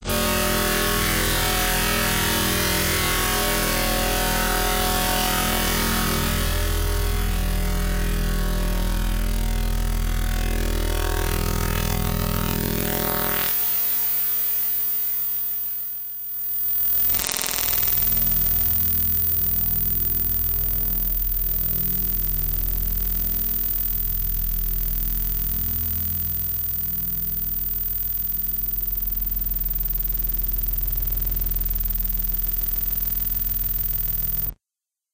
Sounds intended for a sound experiment.
derived from this sound:
Descriptions will be updated to show what processing was done to each sound, but only when the experiment is over.
To participate in the sound experiment:
a) listen to this sound and the original sound.
b) Consider which one sounds more unpleasant. Then enter a comment for this sound using the scores below.
c) You should enter a comment with one of the following scores:
1 - if the new sound is much more unpleasant than the original sound
2 - If the new sound is somewhat more unpleasant than the original sound
3 - If the sounds are equally unpleasant. If you cannot decide which sound is more unpleasant after listening to the sounds twice, then please choose this one.
4 - The original sound was more unpleasant
5 - The original sound was much more unplesant.
Dare-26, databending, experimental, image-to-sound, sound-experiment, unpleasant